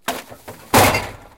throwing garbage
opening a garbage bin and throwing a bag.
Edirol R-1